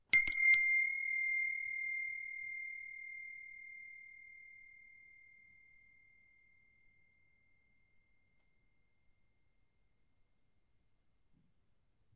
brass bell 01 take8
This is the recording of a small brass bell.